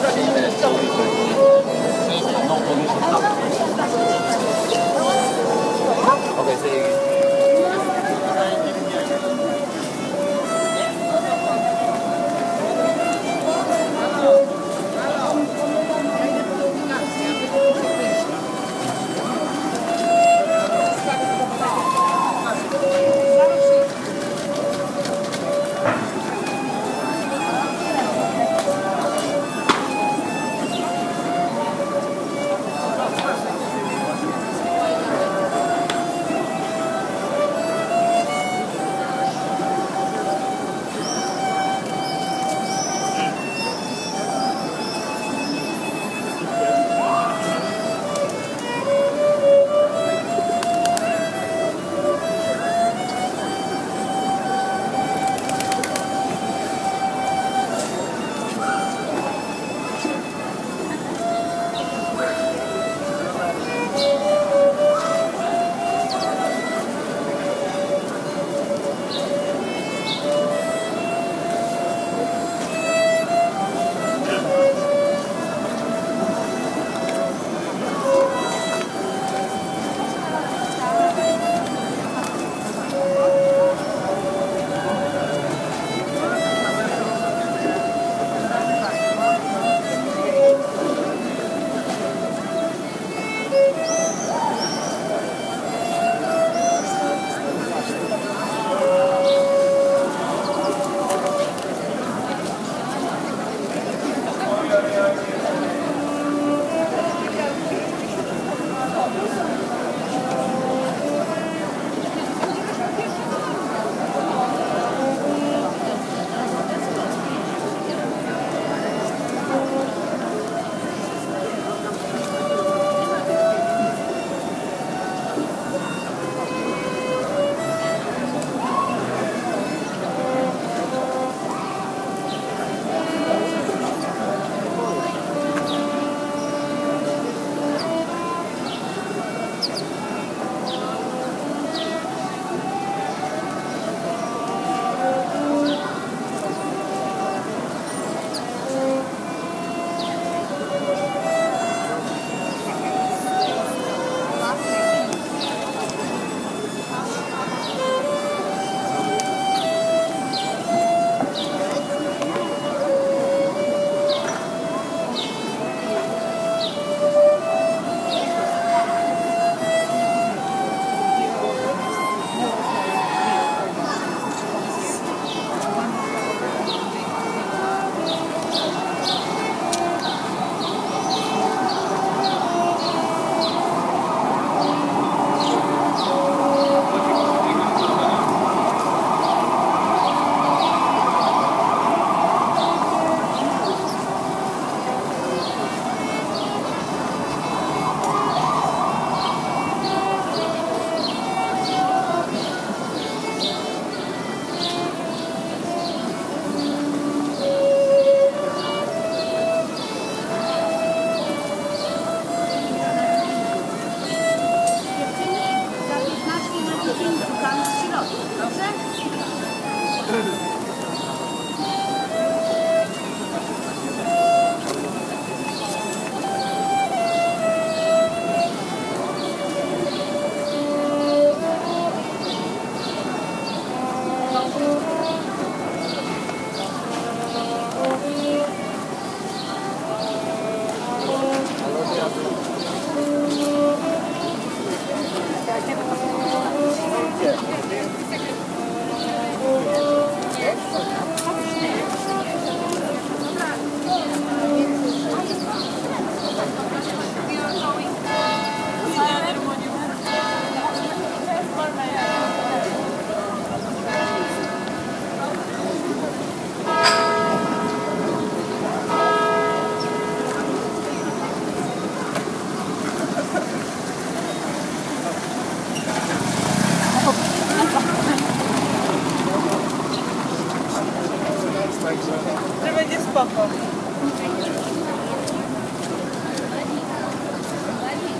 Old Town Violin with Street Sounds
06/23/2016, someone playing violin in the square in old-town, warsaw, poland, with groups of people passing by and talking
city, street, crowd, violin, europe, warsaw, poland, street-musician